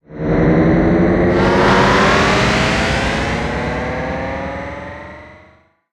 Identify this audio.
Sci-fi sound effects (29)
Sound design elements.
Effects recorded from the field of the ZOOM H6 recorder,and microphone Oktava MK-012-01,and then processed.
Sound composed of several layers, and then processed with different effect plug-ins in: Cakewalk by BandLab, Pro Tools First.
I use software to produce effects:
Ableton Live
VCV RACK 0.6.0
Pro Tools First
abstract, opening, metal, transformer, Sci-fi, woosh, transition, transformation, futuristic, destruction, metalic, atmosphere, morph, dark, stinger, cinematic, impact, drone, scary, moves, glitch, horror, background, hit, rise, game, noise